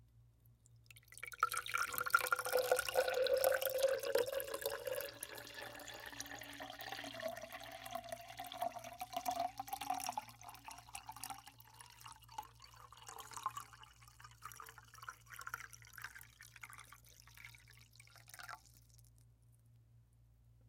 Pour into Glass No Ice FF357
Continuous pour of liquid into empty glass until full, slower pour
empty, glass, pour